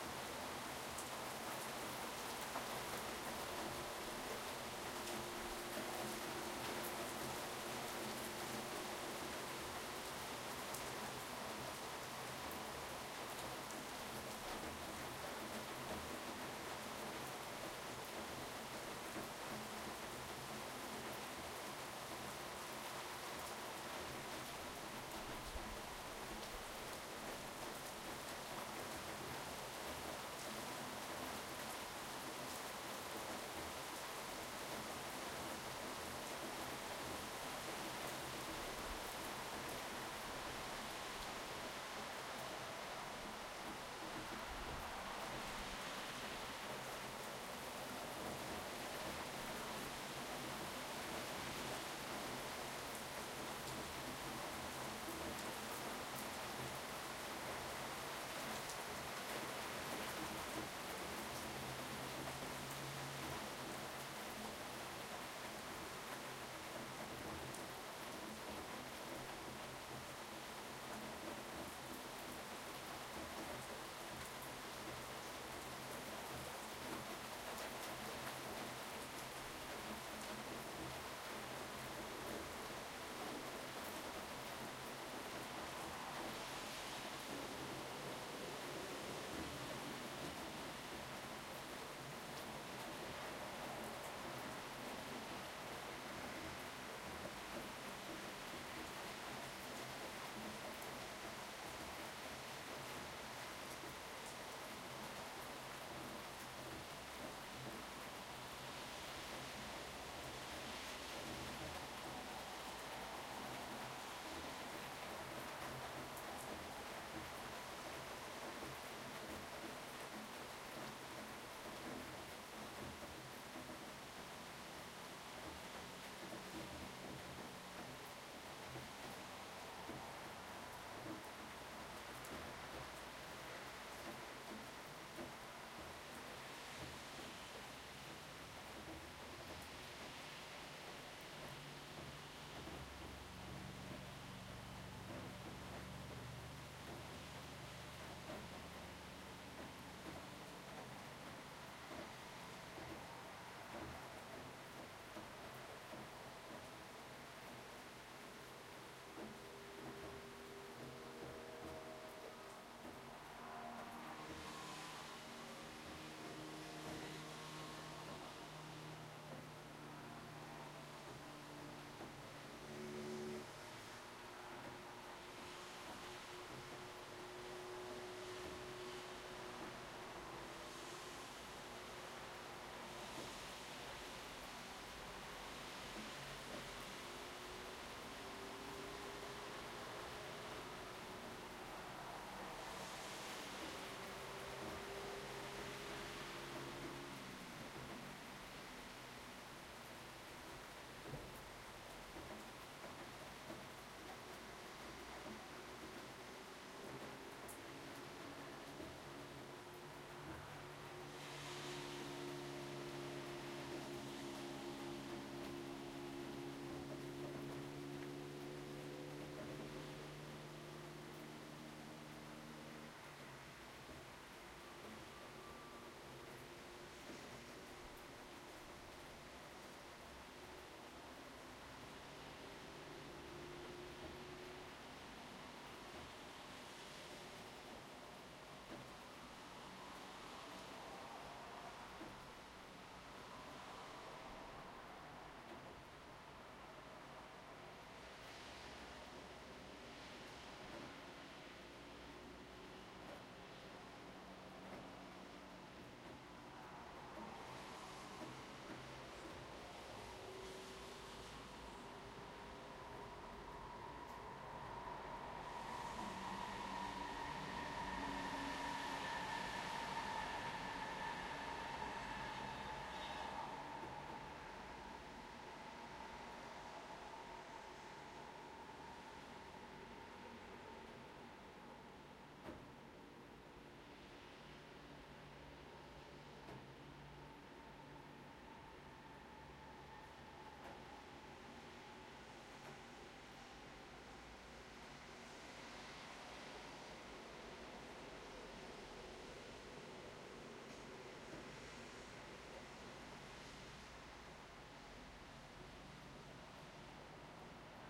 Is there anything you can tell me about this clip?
Oma sade 3 - ZOOM
Heavy rain recorded on my balcony. There are some mild traffic noises on the background.